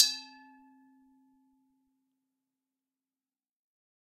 Wine Glass Hit C4
Wine glass, tuned with water, being hit with an improvised percussion stick made from chopstick and a piece of plastic. Recorded with Olympus LS-10 (no zoom) in a small reverberating bathroom, edited in Audacity. The whole pack intended to be used as a virtual instrument.
Note C4 (Root note C5, 440Hz).
hit, note, percussion, one-shot, percs, clean, melodic, glass, water, percussive, wine-glass, tuned, instrument